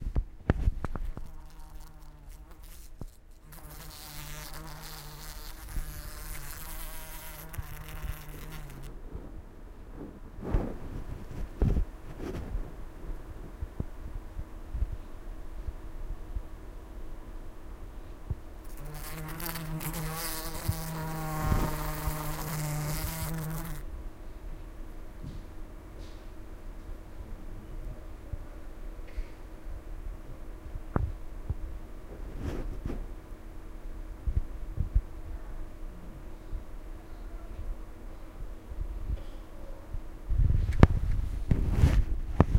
A wasp buzzing around a room.